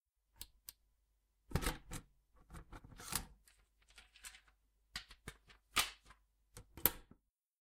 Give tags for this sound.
Button; Casette; Click; Eject; Ejection; Tape

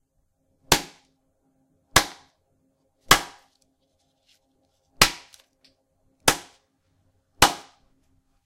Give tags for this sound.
cut; knive; bone; impact; butcher

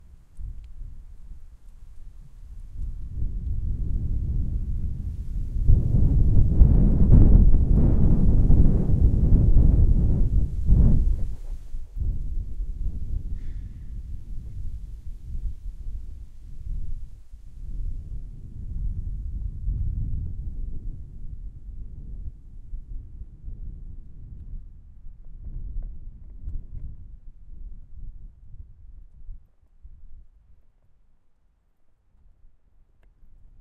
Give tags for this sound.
wind; field-recording; ambient

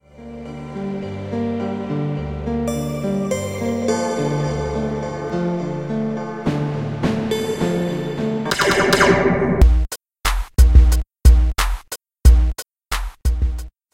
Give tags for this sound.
sound-drama; beat; mix

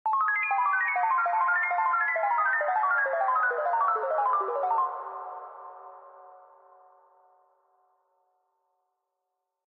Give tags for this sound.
effect; rpg; game; games; over; digital; maker; sound; sfx; video

